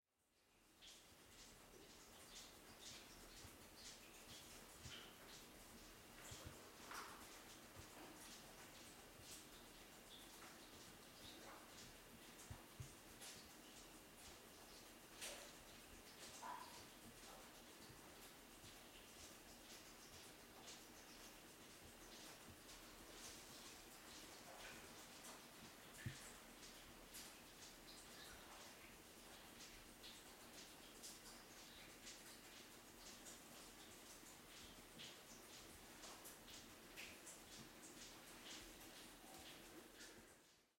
Some water falling down, from far and so with quite a lot of reverb, recorded on DAT (Tascam DAP-1) with a Sennheiser ME66 by G de Courtivron.